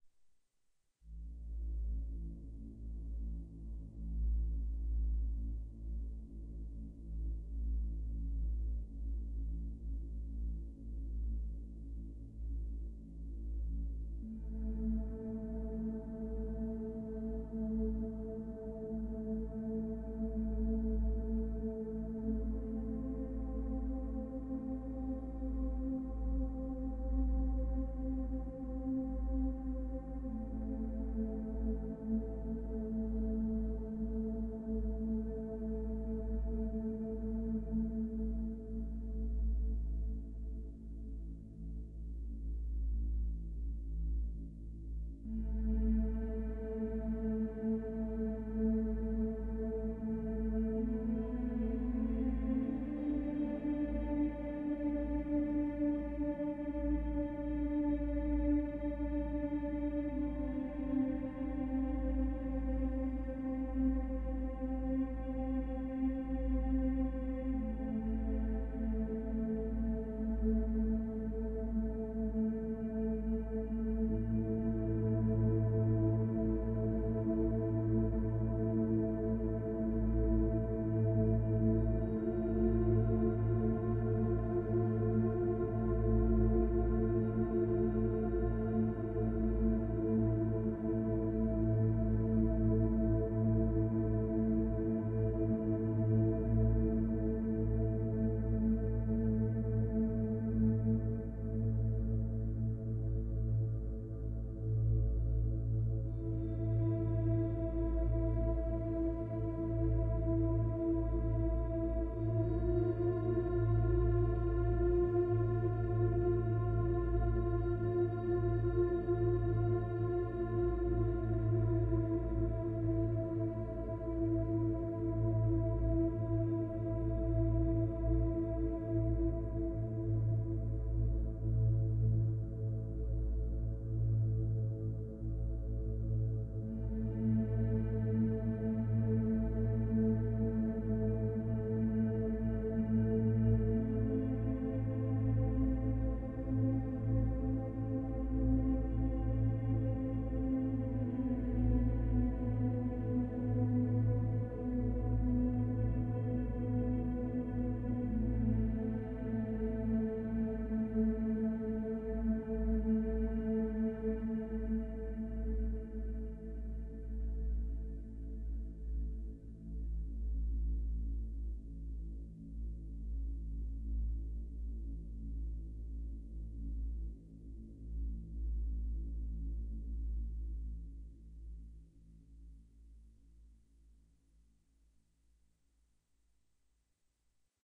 lonely music #2
A music piece designed to bring a lonely and dark feeling to a project. created by using a synthesizer, recorded with MagiX studio, edited with audacity.
atmosphere, music, lonely, ambience, dark, synth, sci-fi